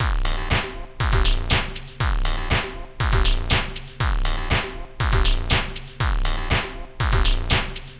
Electronic Percussion Loop